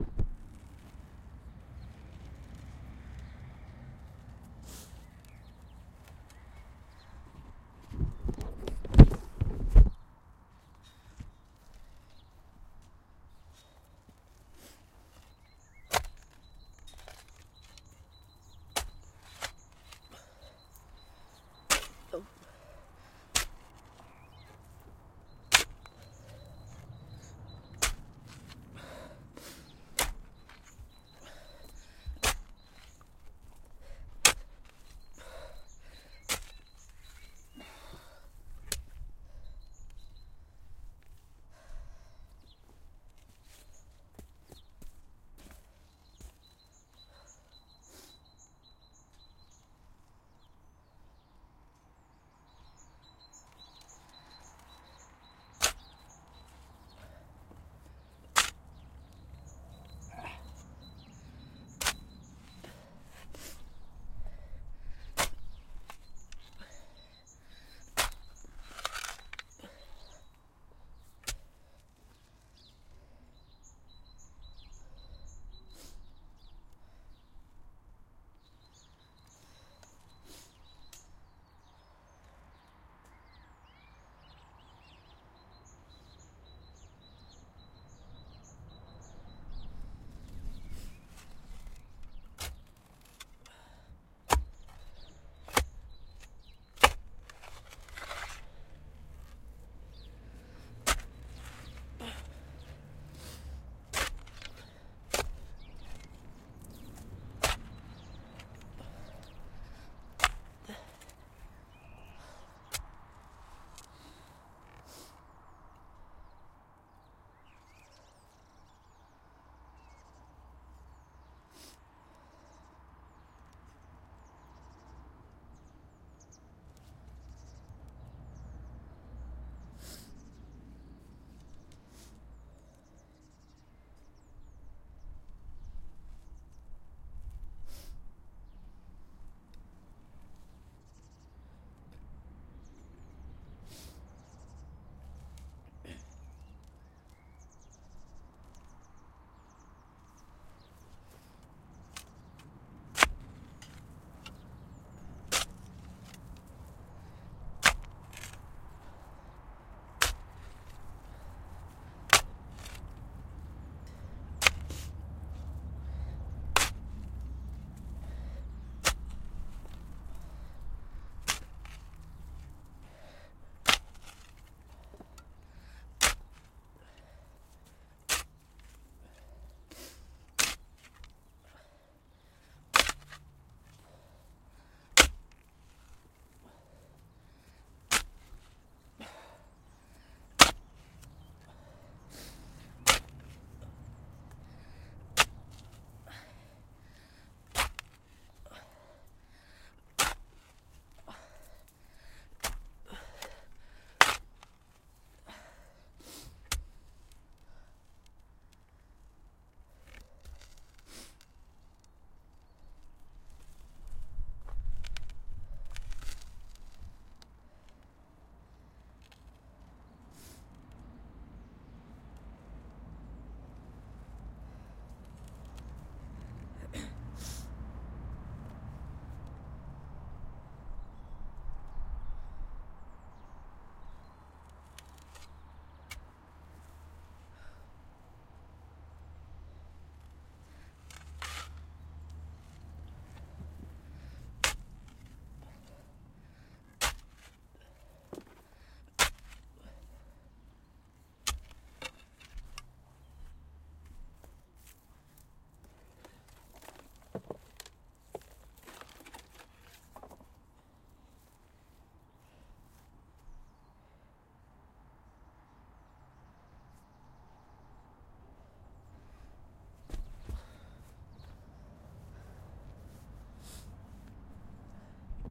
Continuous digging, First couple of seconds are messy, after that should be a clean recording. Birds. Wind. Outdoor digging. Possibly some breathing.
Digging, earth, field-recording, grave, nature